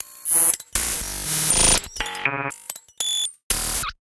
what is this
BuzzBeats 120bpm05 LoopCache AbstractPercussion
Abstract Percussion Loop made from field recorded found sounds
Percussion, Loop